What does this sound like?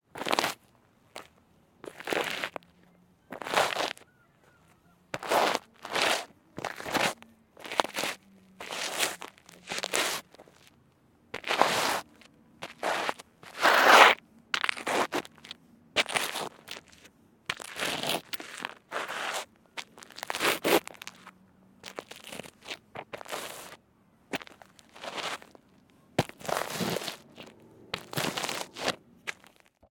Male footsteps in trainers scraping against gravel
release, gravel, stone, foostep, scrape